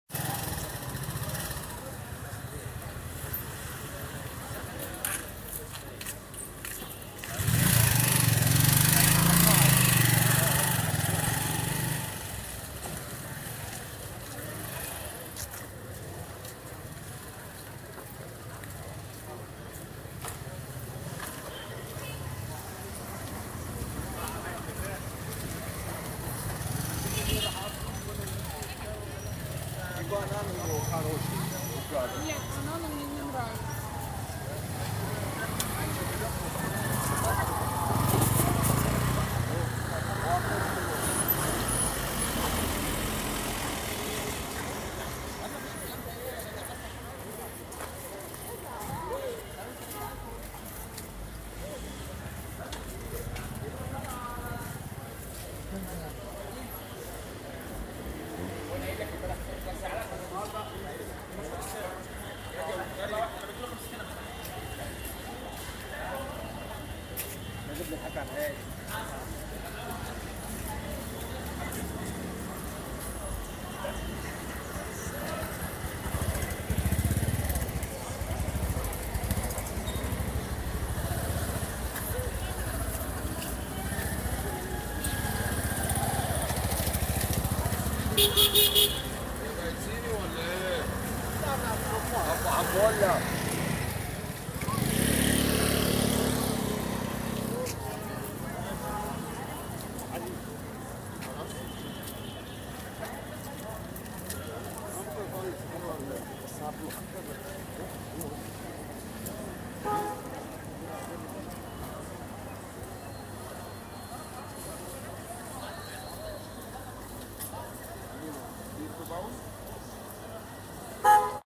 AMB Giza street-7-DEC-2019-01
Giza market, vendors talking and motorbikes passing by.